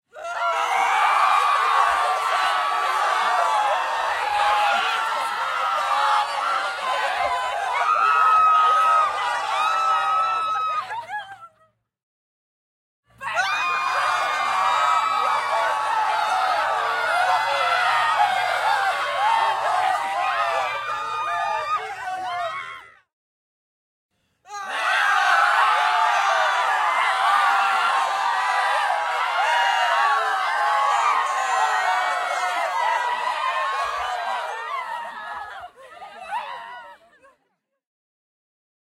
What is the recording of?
Medium sized, mixed male and female, crowd yelling and screaming in panic.
Recorded (and featured) by students of Video Games and Animation from the National School of Arts of Uruguay, during the Sound Design Workshop.